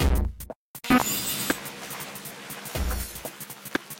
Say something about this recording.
MOV.beat sonidus 1
Computer beat Logic
distorsion, loop, beat, computer, processed